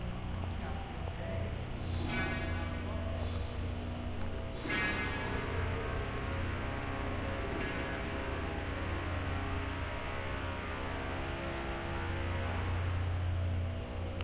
Genius Hour and radio club students from GEMS World Academy Etoy IS, went exploring a construction. And not just any construction... the new sport centre.